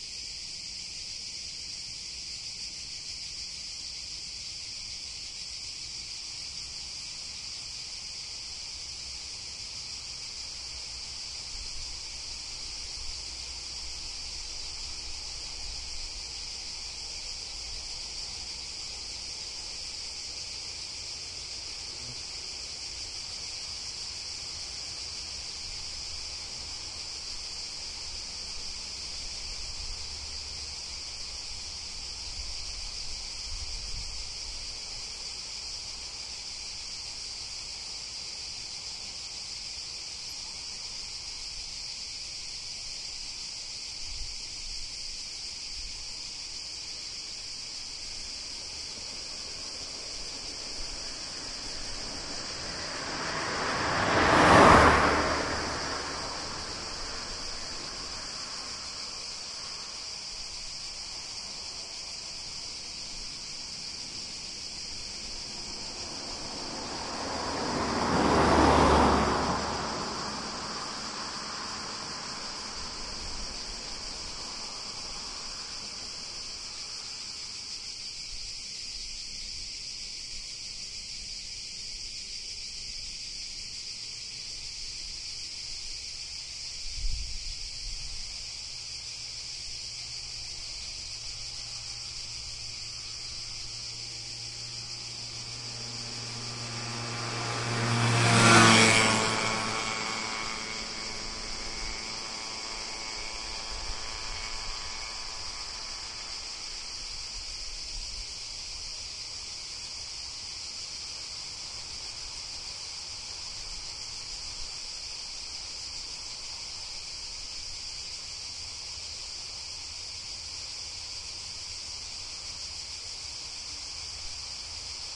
Surround recording of a small country road near the Croatian town of Brela. It is a sizzling hot summer noon, crickets are chirping, several cars can be heard driving by the recorder, which is situated on the side of the road, facing the road at a height of approx. 1.5m.
Recorded with a Zoom H2.
This file contains the front channels, recorded with a mic-dispersion of 90°